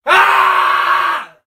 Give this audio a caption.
Panic-stricken screaming #1
A male, panic-stricken scream.
fearing, afraid, scared, frightened, panic, cry, fearful, horror, terror, scream, panicking, yell, frightful, fear